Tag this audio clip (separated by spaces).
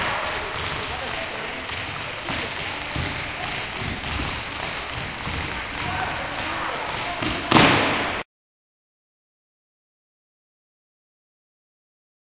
bang dance echo gym gymnasium synchronized-clapping tap tap-dance tap-dancing tapping thumping